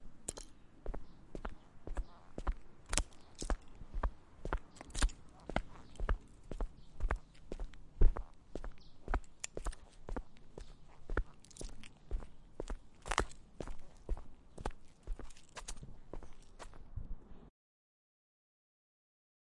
Outdoor Walking With Leaves and Wind
Walking outdoors through the street.
Field-Recording, Footsteps, Outdoors, OWI, Walking